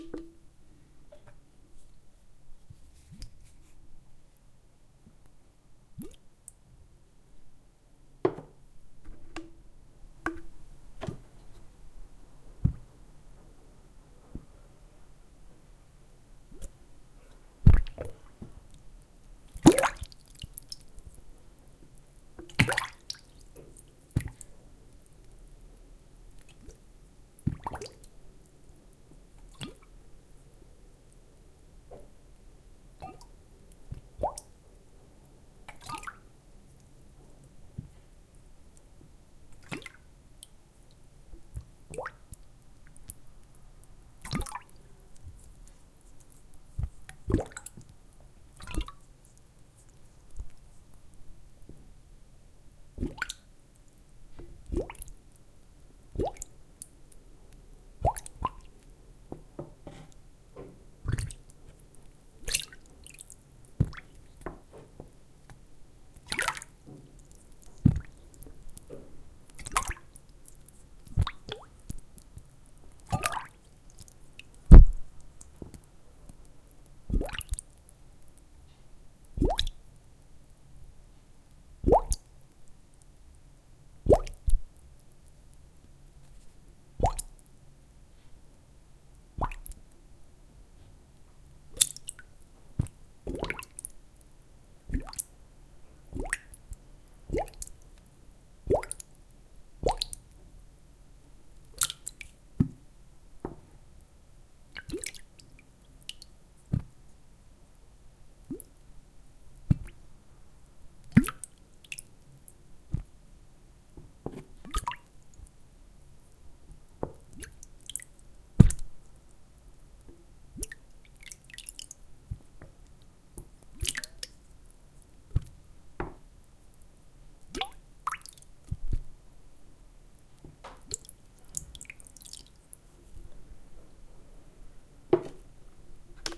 more bubble blubs.
blub, bubble, bubbles, water